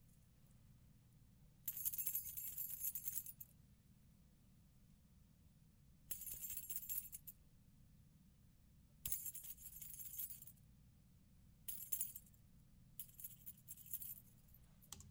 a set of keys being shaken